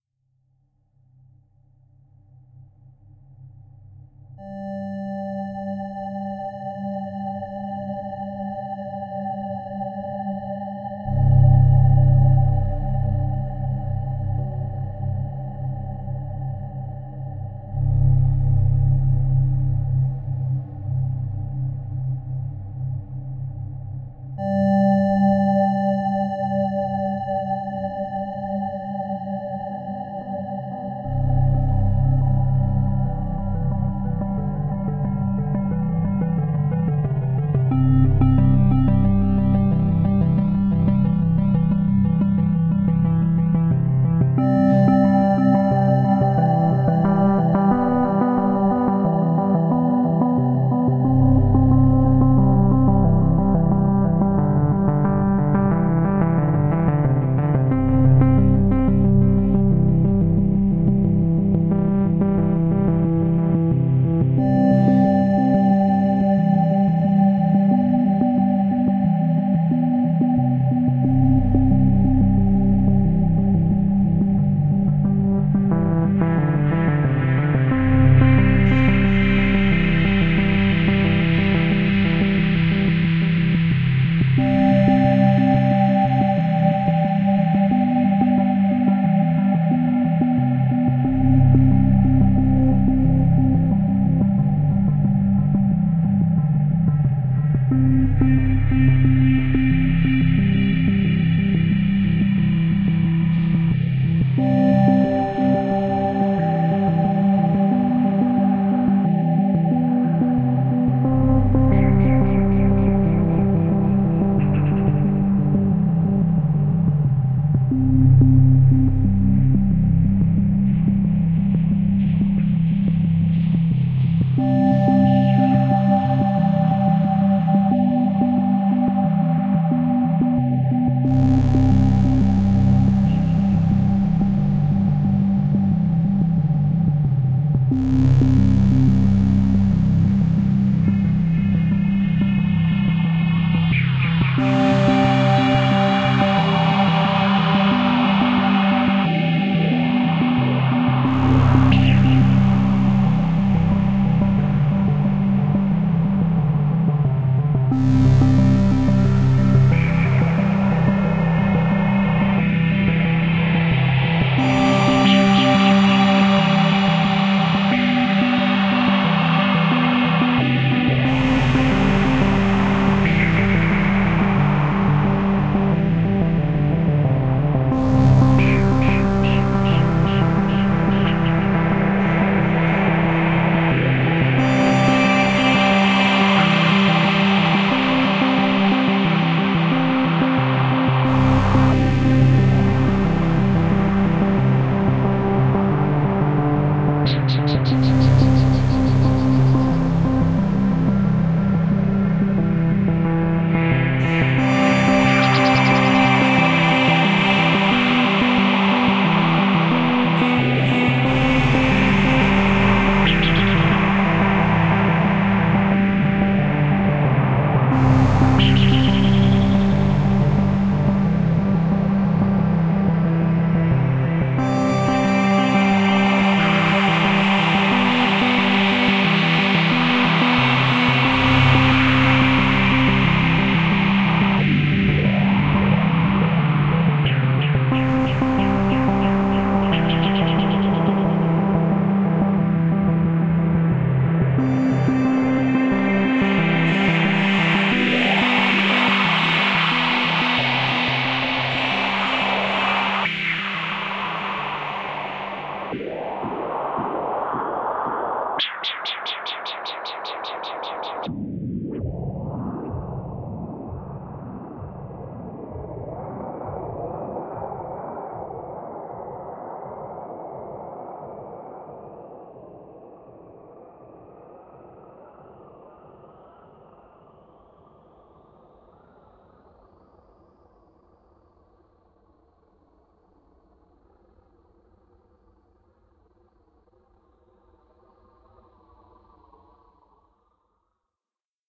BCO - rose lemonade.
Warm, drifting pads are a foundation for a dancing melody and whispy lead.